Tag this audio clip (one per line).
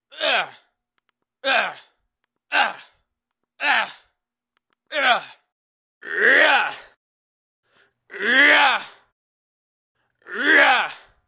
screams
punched
yell